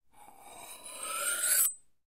knife sharpen - slow 03
Sharpening a knife slowly.
knife-sharpening, sharpening, scraping, scrape, metallic, grinding, grind, sharpen, slide, knife, sliding, metal